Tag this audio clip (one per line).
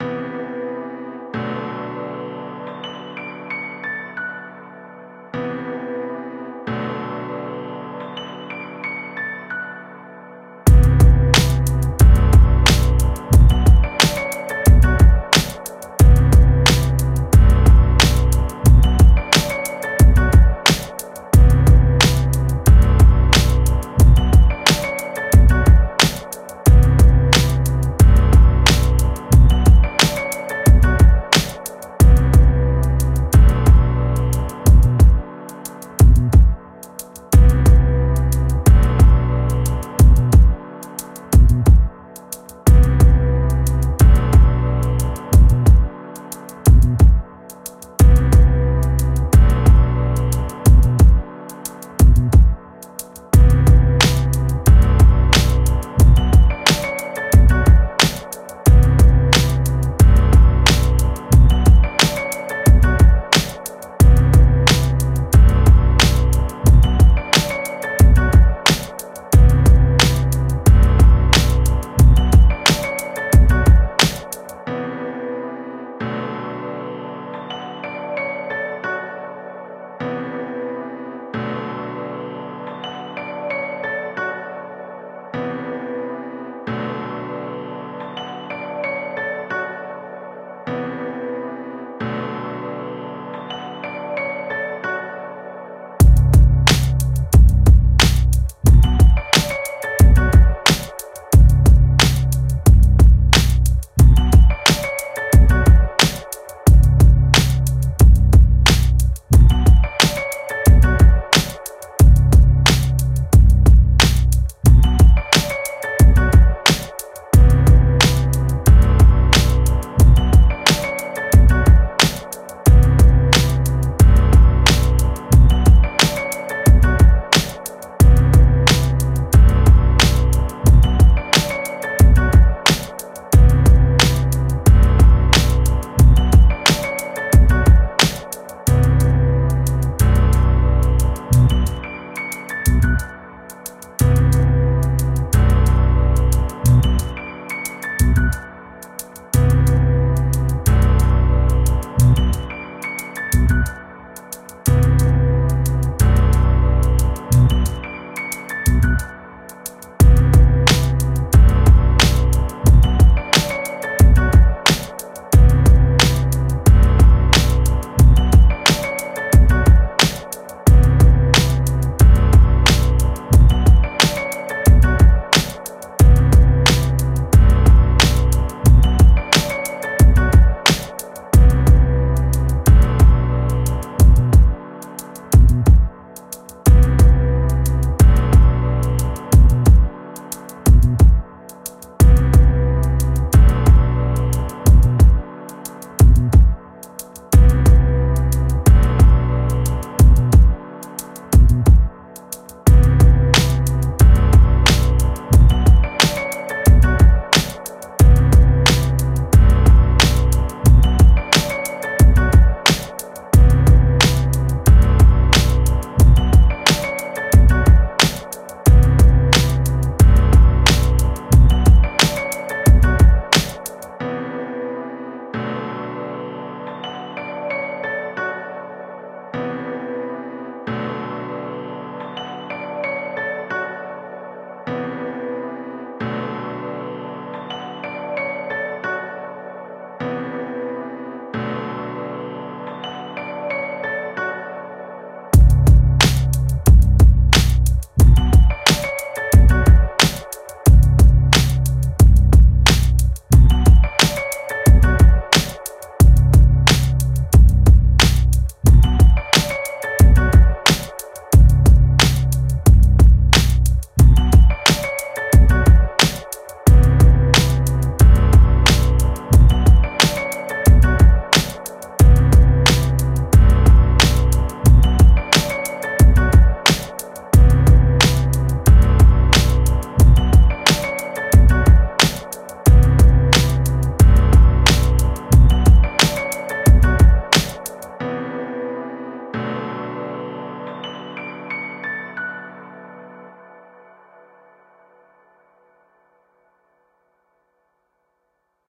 90
backround
bass
beat
bpm
drum
free
loop
loops
music
percs
piano
podcast